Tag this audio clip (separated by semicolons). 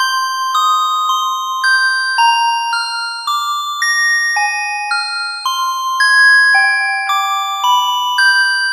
110bpm; synth